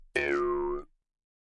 Mouth harp 9 - lower formant staccato down
A mouth harp (often referred to as a "jew's harp") tuned to C#.
Recorded with a RØDE NT-2A.
foley, formant, instrument, harp, traditional, formants, tune, mouth, Mouthharp, jewsharp